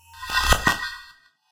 Attacks and Decays - Double Hit 2
Noise reduction effect quite audible. Highly synthetic sounding with timbre changing throughout.
electronc, experimental, hit, spectral, synthetic